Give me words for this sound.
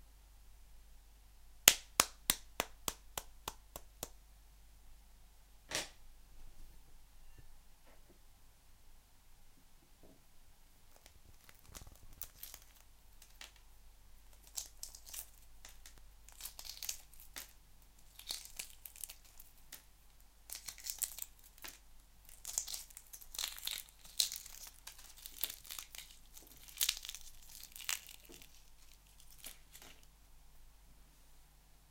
Cracking a boiled egg then shelling it.
Mic sE4400a, Apogee duet, Macbook Pro, Audacity